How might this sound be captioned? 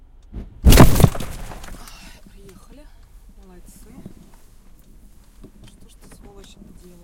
Car accident. Real. Interior.
We got in real car accident during recording the car sounds. Another car smashed the trunk of our skoda superb. the result is overclipped but it sounds surprisingly real and native.
ORTF stereo.
documentary, crash, Car, accident